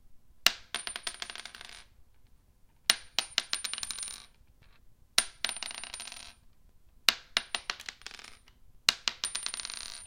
.30 Shell Bouncing
Dropping a .30 Carbine shell from about 5 cm onto a wooden desk. Recorded with audactiy
dropping, casing, shell, 30-caliber, 30, bouncing, bullet